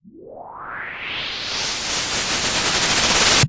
This is a riser that rapidly increases in speed log rhythmically. Sound generated from a FL Studio native vst.
Note: This sound is already used in a project from years ago.
Enjoy.